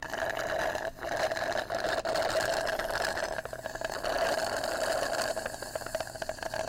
Straw Slurp
Nothing left in the drink. Recorded with a shotgun mic hooked up to a camera.
sound, foley, effect, straw, slurp